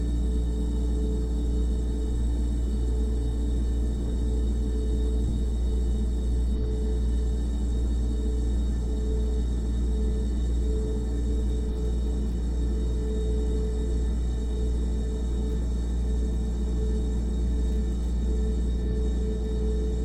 refridgerator coils
I attached a contact microphone to the coils behind my refrigerator while it was on. The sound was a interesting drone. Recorded with a Cold Gold contact microphone into a Zoom H4.